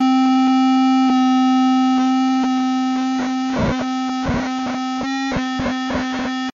circuit bending fm radio